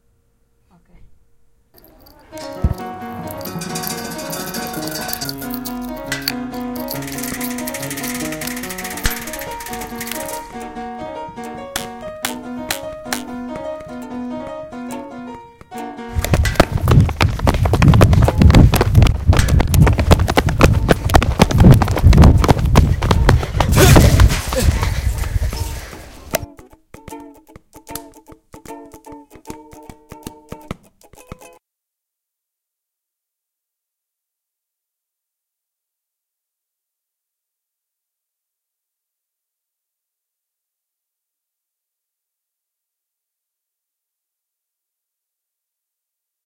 SoundScape RB JudithIria
Soundscape made by pupils at the Ramon Berenguer school, Santa Coloma, Catalunya, Spain; with sounds recorded by pupils at Humpry David, UK; Mobi and Wispelberg, Belgium.